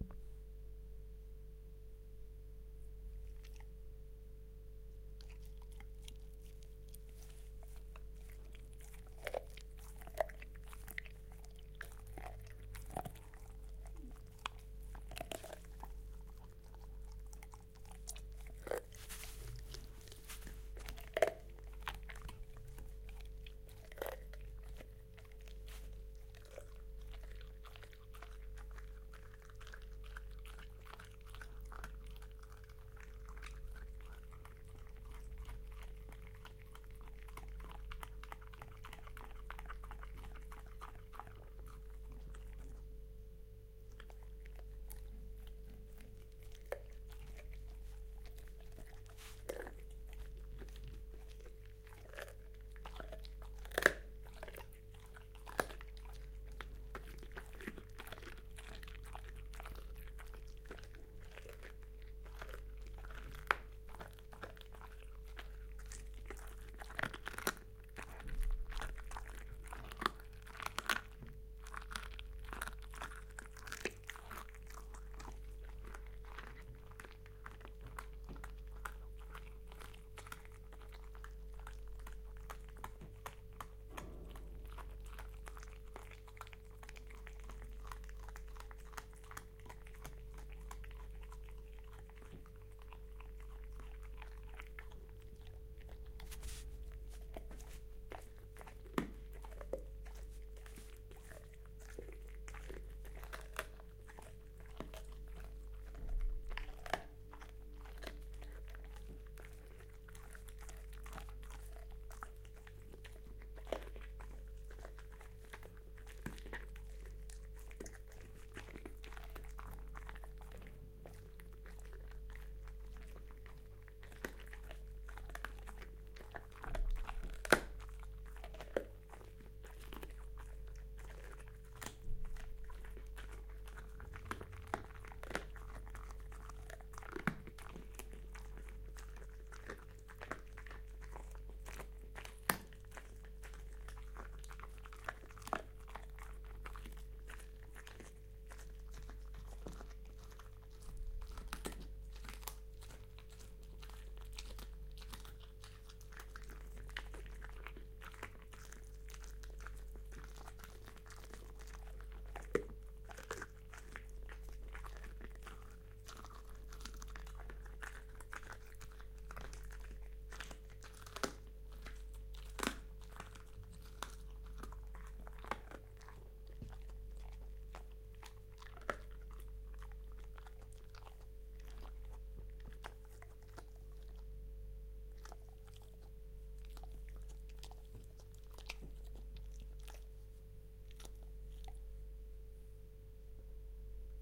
Dog eating Neck of Goose / Chewing / Breaking Bones
Hi there! This is my dog (10yrs old Lab-Staf-Mix) eating a goose neck. Sorry for the Fridge in the background.
bones, break, chewing, crack, Dog, food, goose, horror, intense, neck, zombie